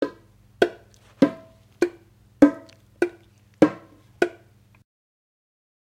JV bongo loops for ya 2!
Some natural room ambiance miking, some Lo-fi bongos, dynamic or condenser mics, all for your enjoyment and working pleasure.
tribal, loops, bongo, congatronics, samples, Unorthodox